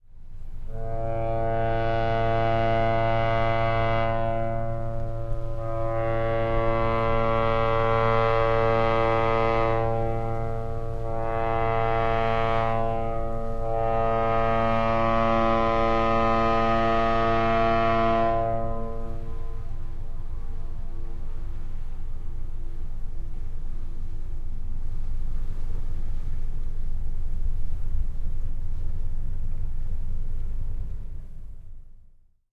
Another ship entering Bergen harbour in front of the United Sardine Factory. The smallest and oldest of the tourist fleet. Microtrack recorder...Bon Voyage!!